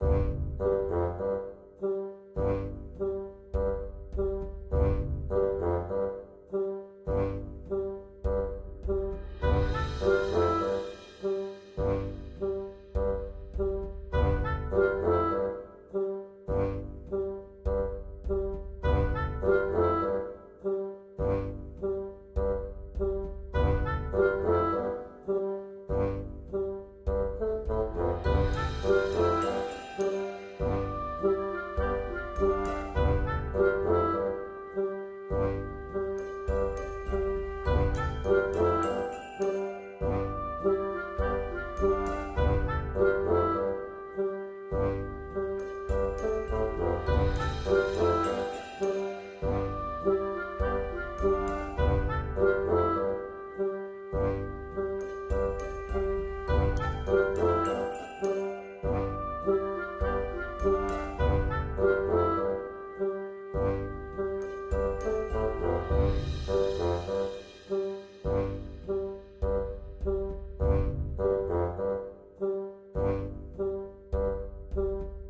Game-Music-01
Just a simple track for games and other purposes.
Enjoy!